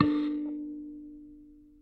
44kElectricKalimba - K5clean
Tones from a small electric kalimba (thumb-piano) played with healthy distortion through a miniature amplifier.
amp, bleep, blip, bloop, contact-mic, electric, kalimba, mbira, piezo, thumb-piano, tines, tone